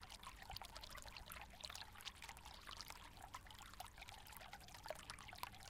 Water from a small fountain. Loops.
Recorded on a Tascam DR-07 Mk II.
fountain, water, dripping, field-recording